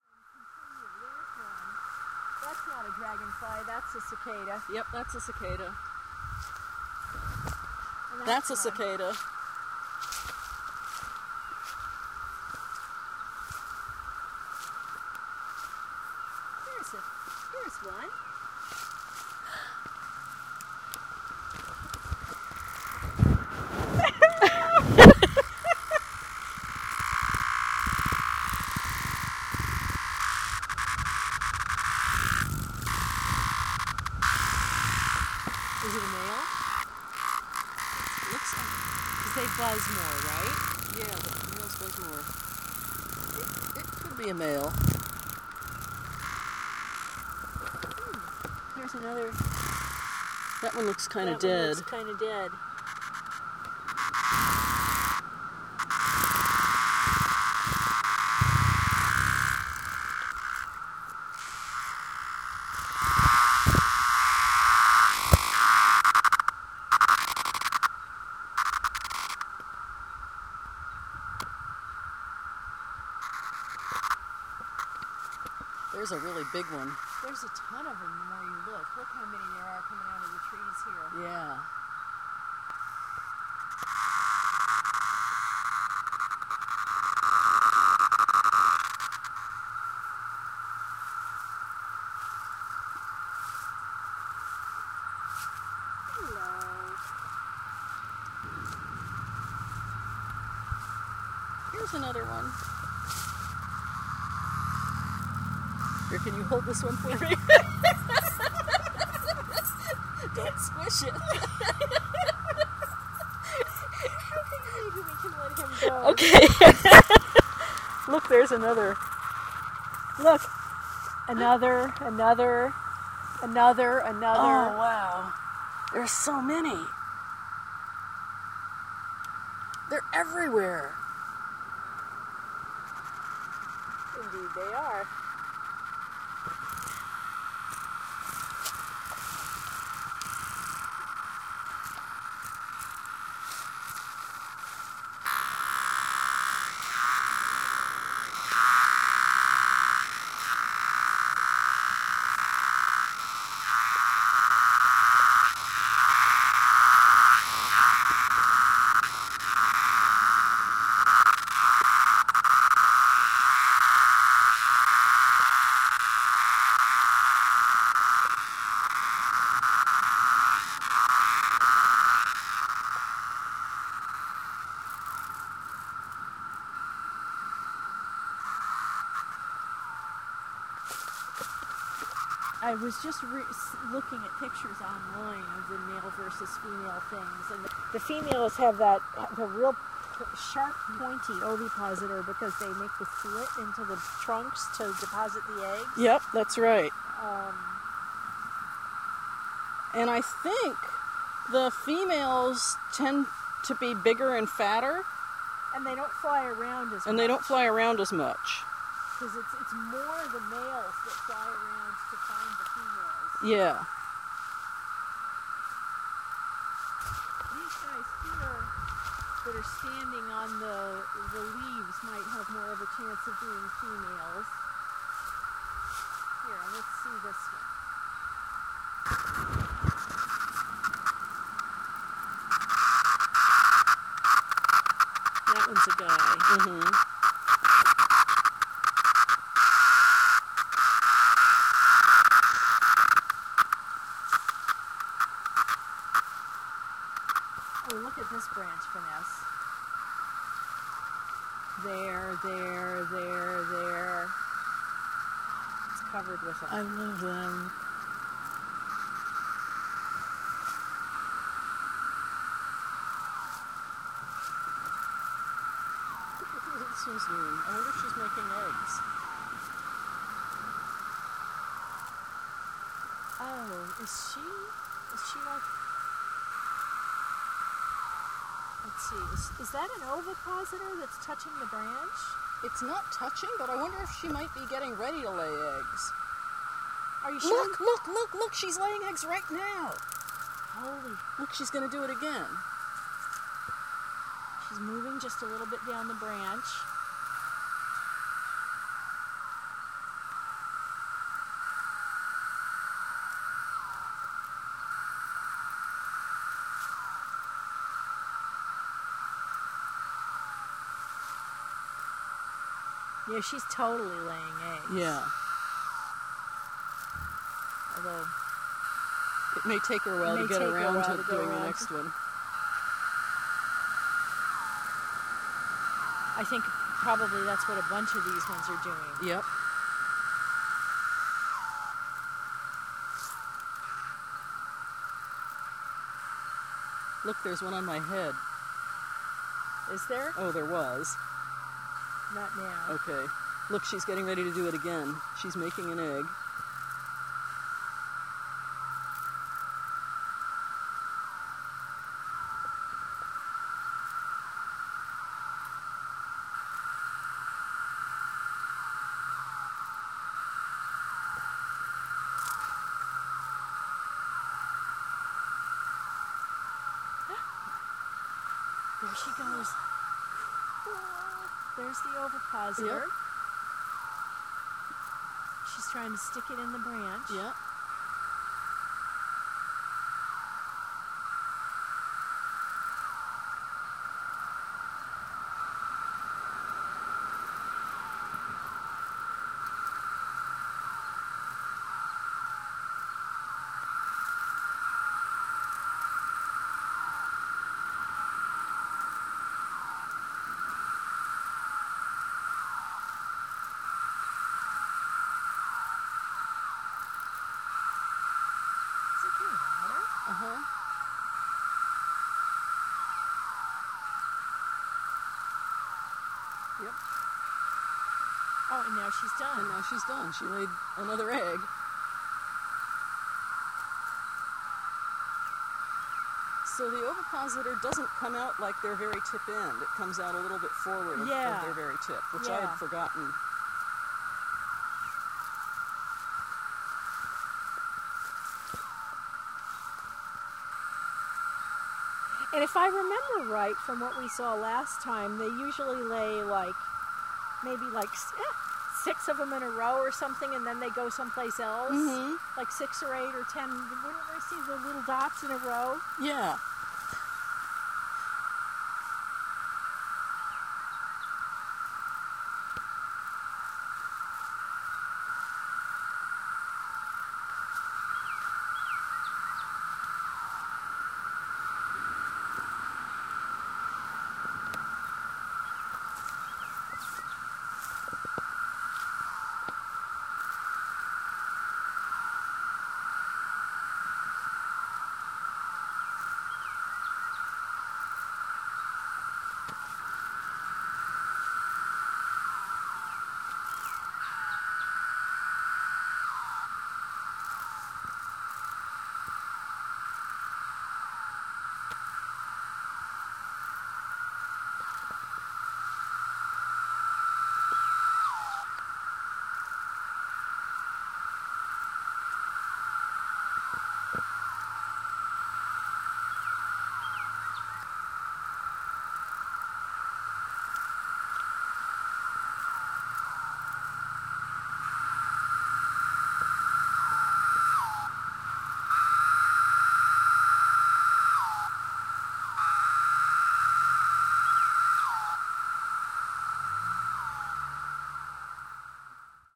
Fearless Cicada Hunters
Looking for 17-year cicadas in a field at the edge of a woods near Southington, Connecticut, USA. The high-pitched background noise is thousands of cicadas singing in the woods. The loud buzzing noises are individual cicadas who got pissed off when we picked them up (no cicadas were harmed). This is Brood II, which emerges along the eastern seaboard once every 17 years--1996, 2013, etc. Recorded with a Zoom H2 on June 22, 2013.
buzzing, field-recording, laughing, laughter, Magicicada-septemdecim